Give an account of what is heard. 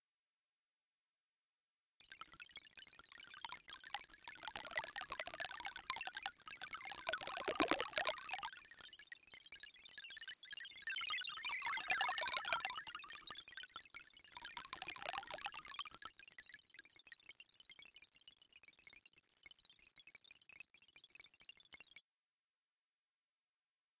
a crisp loop of grains
bright, grains, grainy, reverb